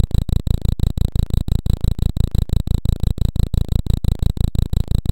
YP Plague Drone Loop 12
Low-pitched, rapidly pulsing tone, reminiscent of an idling motor.
hum, sound-design, effect, ambient, idling, industrial, electrical, sfx, noise, 1010, electric, motor, soundeffect, yersinia, droning, strange, plague, machine, pestis, blackdeath, lo-fi, uneasy, drone, sci-fi, digital, black-death, fx, dark, background, loop